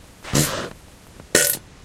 fart poot gas flatulence flatulation explosion noise weird
explosion, fart, flatulation, flatulence, gas, noise, poot, weird